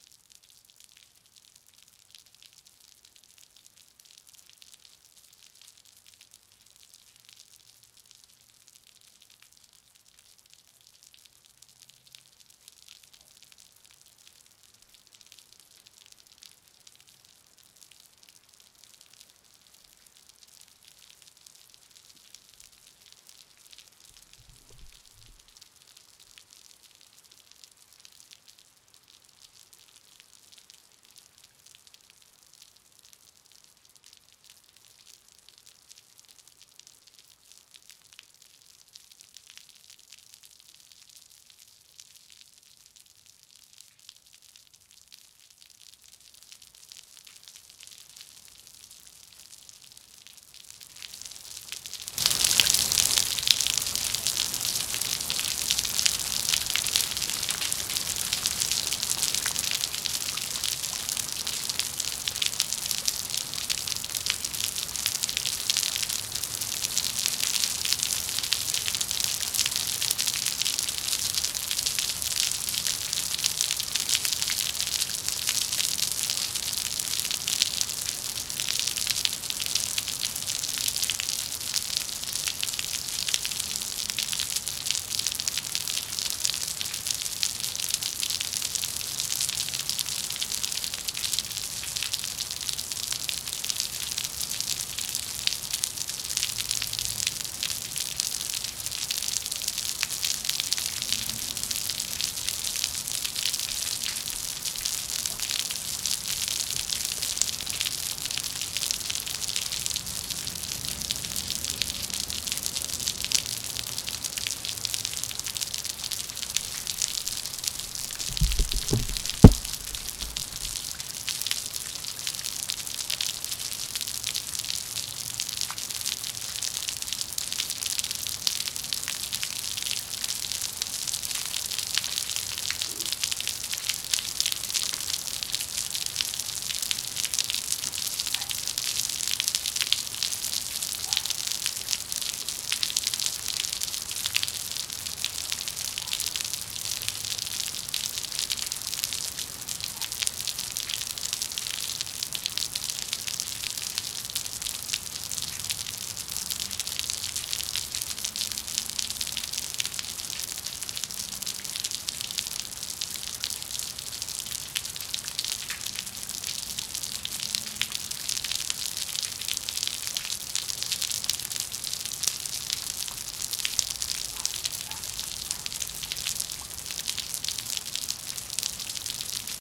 Recording of rain on a cement patio under a roof ledge with no gutters. 2 microphones, sm58 and condenser.